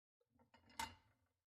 Taking a plate.
{"fr":"Prendre une assiette 2","desc":"Bruit d'assiette soulevée.","tags":"assiette couvert cuisine"}